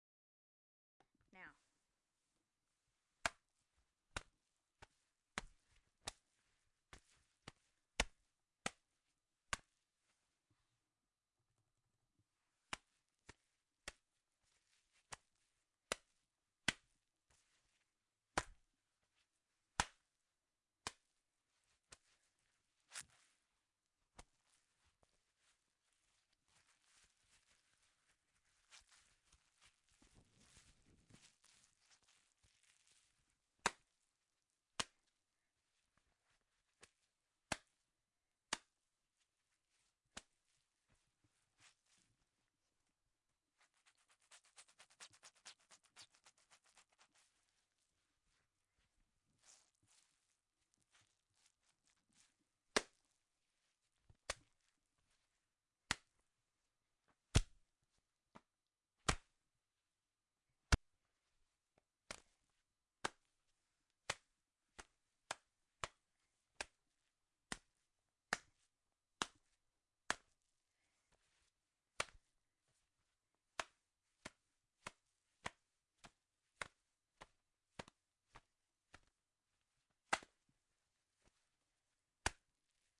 Wet Slaps
Abusing a wet rag